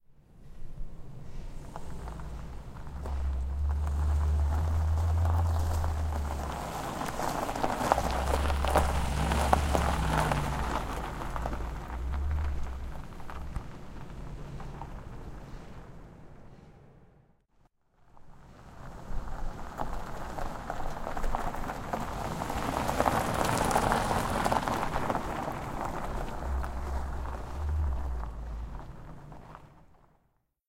je carongravel
Prius driving slowly over gravel
car, gravel, prius